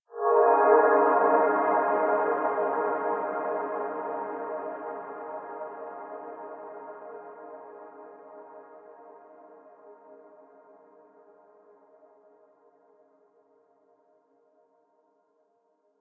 Heavenly Pad Verb
An Fsus4 chord with some F minor notes scattered about it. Reverbed to sound angelic.
Thank you!
spacious, serene, heavenly, new-age, hall, atmo, reverb, calm, synth, pad, digital, atmosphere, verb, synthesizer, atmos, space